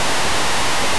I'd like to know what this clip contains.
mandelbrot c=(0.601175939611+0.0116844717453j), z=(0.612601562989+0.418457916505j) imag
Experiments with noises Mandelbrot set generating function (z[n + 1] = z[n]^2 + c) modified to always converge by making absolute value stay below one by taking 1/z of the result if it's over 1.